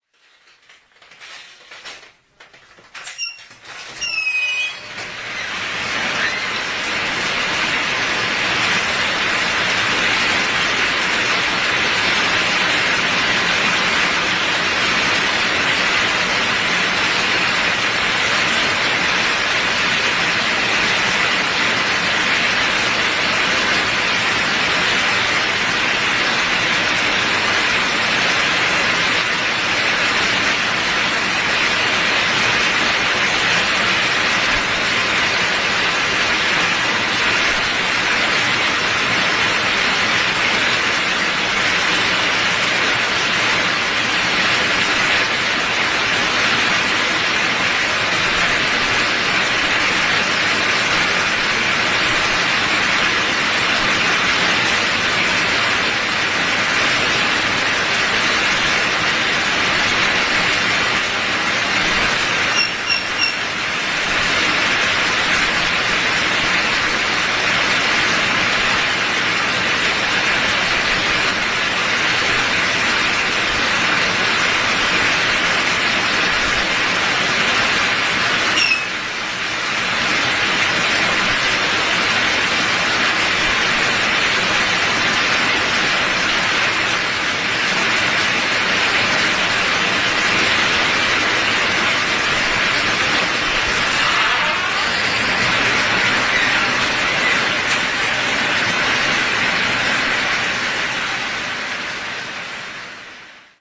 05.09.09: about 12.00. The solarium sound (Długa street in Poznań/Poland). The sound is shortened from 8 min to 1,5 min.
solarium, swoosh